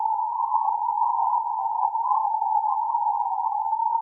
Some multisamples created with coagula, if known, frequency indicated by file name.